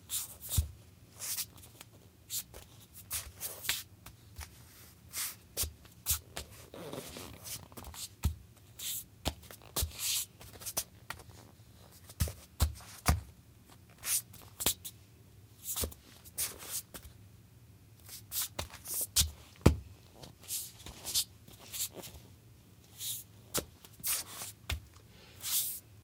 Footsteps, Solid Wood, Female Barefoot, Scuffs
barefoot, female, footsteps, solid, wood